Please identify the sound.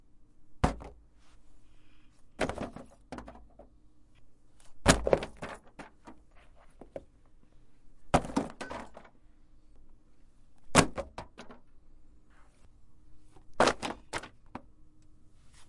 Book Droppped
Dropping some books to rug.
books, drop, dropping, fall, slam